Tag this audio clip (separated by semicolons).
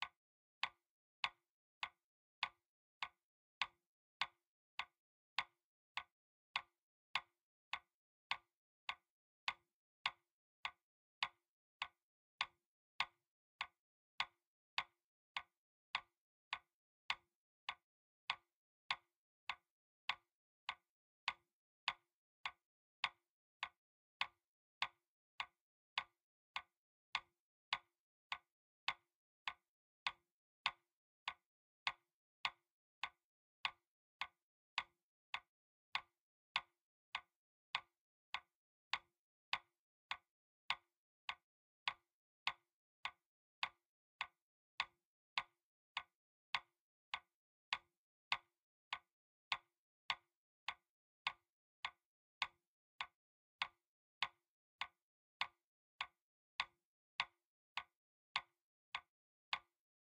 wood-tap; drumsticks; tap; clock; field-recording